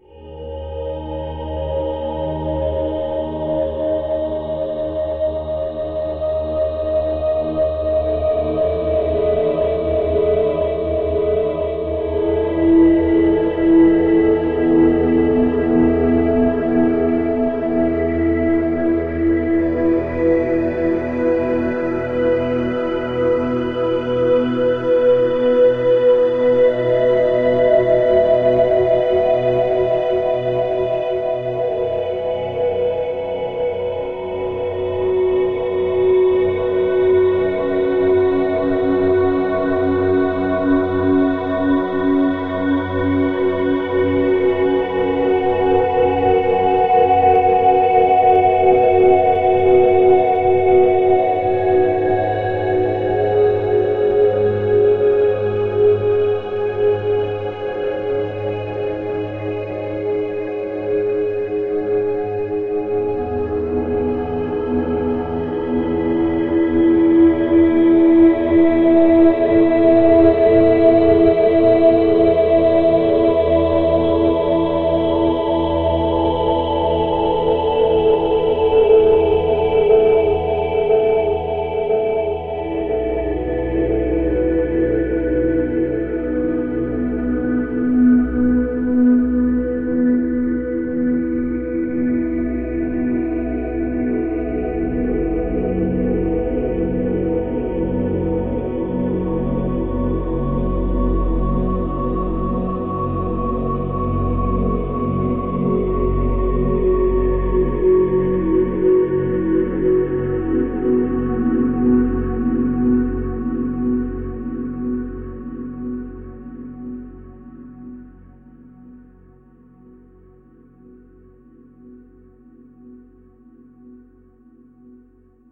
evolving drone pad
Infinitely evolving drone made with Ableton and a combination of freeware synths. Sounds a bit like throat singing. Pitch changes are synced to 20bpm. No audio processing has been done other than cross fading.
ambient, haunting, synth, drone, pad, space, soundscape, evolving